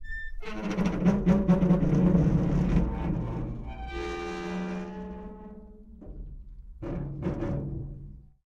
Large metal gate squeaks rattles and bangs.